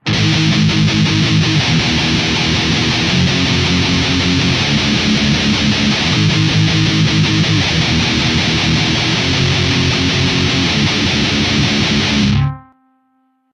a nu rock sounding riff, palm muted recorded with audacity, a jackson dinky tuned in drop C, and a Line 6 Pod UX1.